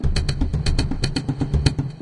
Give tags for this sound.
percussion; rhythmic; loop; 120bpm; beat; drum; drum-loop

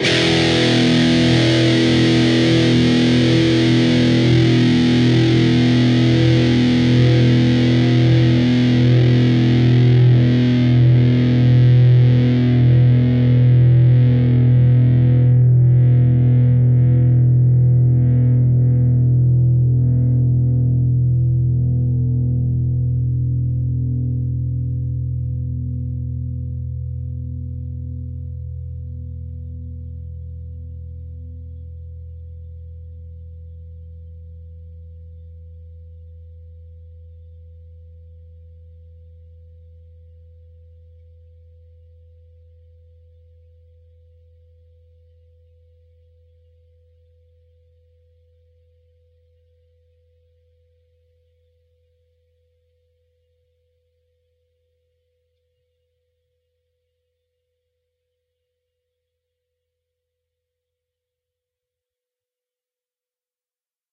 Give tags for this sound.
chords; distorted-guitar; guitar; guitar-chords; rhythm; distorted; distortion

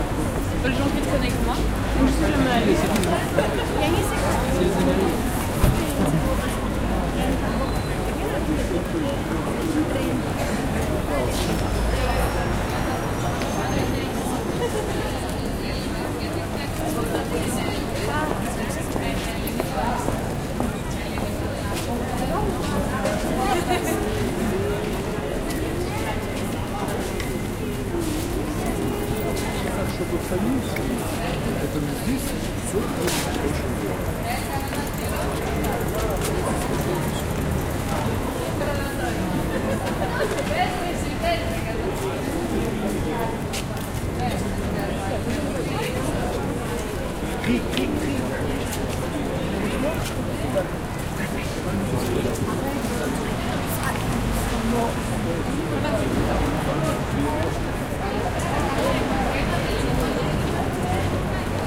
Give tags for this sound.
pedestrians; passerby; latin-quarter; tourists; france; crowd; paris; quartier-latin

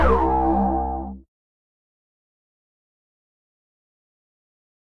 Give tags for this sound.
acid; one-shot; synth

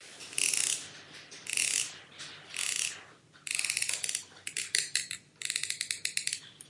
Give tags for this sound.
scratch; scratches; scratching

